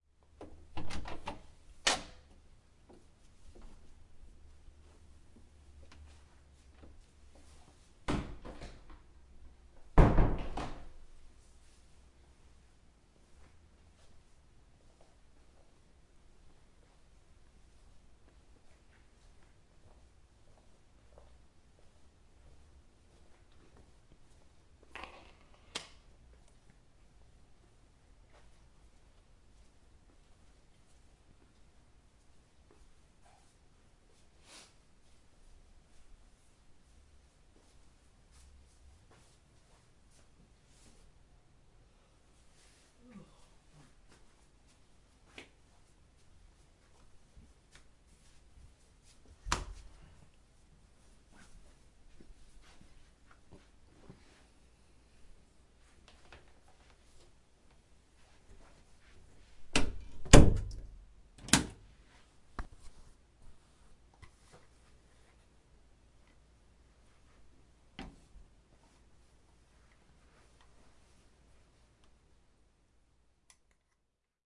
A sound of someone closing a door and walking through a house, can be used for a person preparing for a shower.